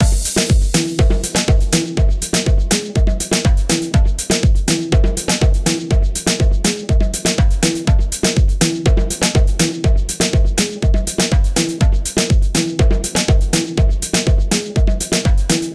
Just a groove with some hand-drums in the mix.